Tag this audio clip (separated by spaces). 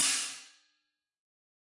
1-shot cymbal hi-hat multisample velocity